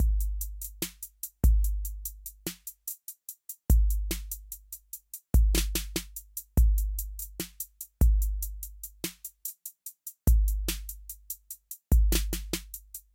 hip hop beat 13
Hip hop beat made using:
Reason 9.5
M-Audio Axiom 49 drum pads
beat, beats, drum-loop, drums, hip, hiphop, hop, loop, loops, rap